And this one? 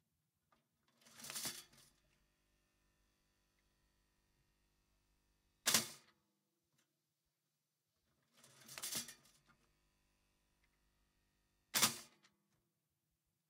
SM57
ProTools
Morphy Richards toster
(HPF at 80hz)